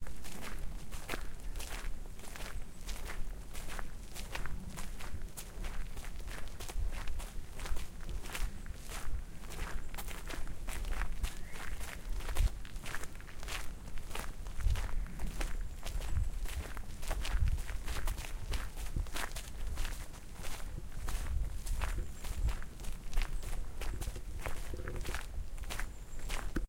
Spazieren im Wald mit Kies
promenading in a forest on a gravel street